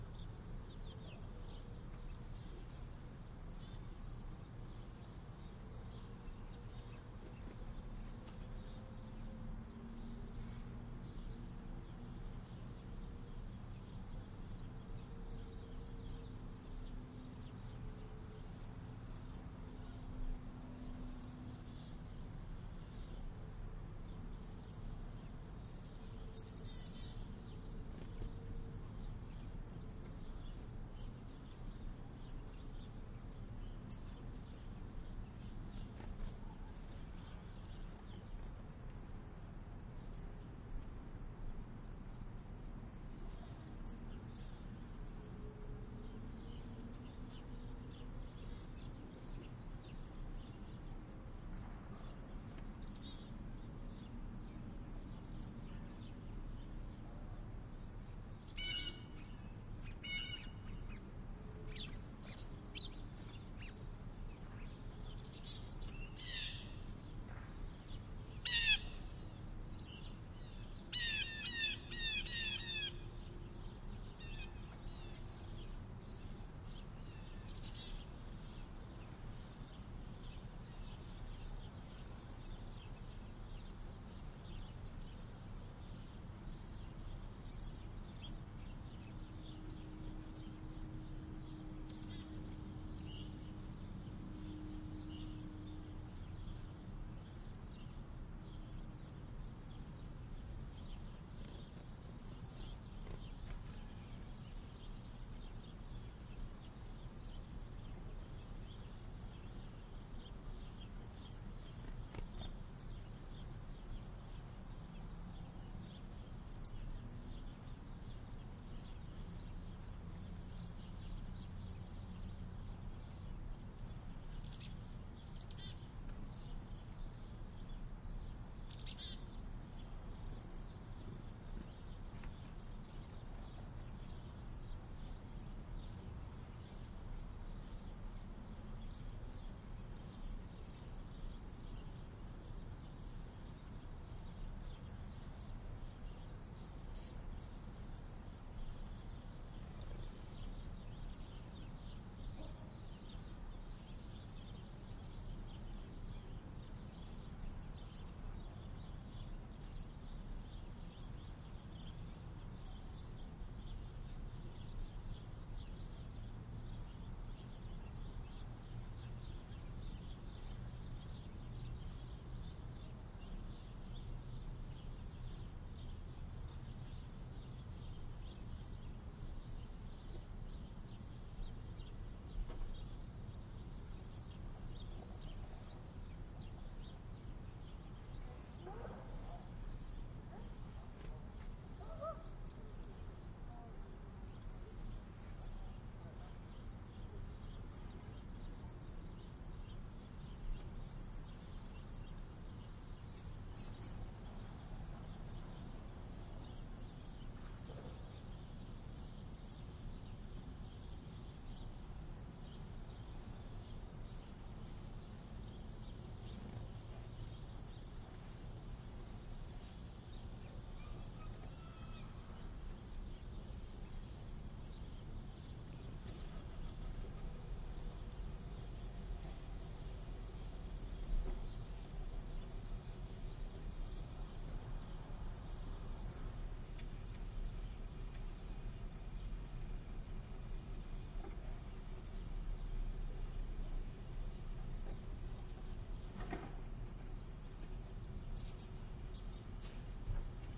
forest backyard residential winter birds chickadees distant skyline
birds, distant, forest, skyline, winter